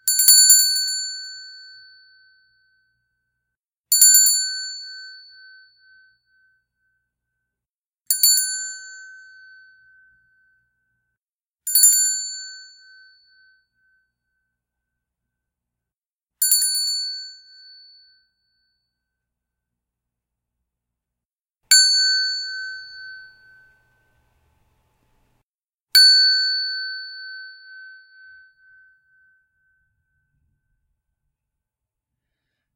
Entrance Bell
Ringing of a small metal bell.
Used Audacity's noise removal filter to to remove background noise.
For this file, I isolated the samples I liked and left a small gap of silence between them for separation later.
bell, store, entrance, Christmas, mall, shopping, ring